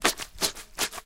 Cleaning something with a weg Swab/Rag